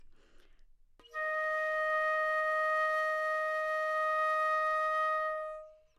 overall quality of single note - flute - D#5
Part of the Good-sounds dataset of monophonic instrumental sounds.
instrument::flute
note::B
octave::3
midi note::47
good-sounds-id::110
dynamic_level::p
single-note, flute, B3, neumann-U87, good-sounds, multisample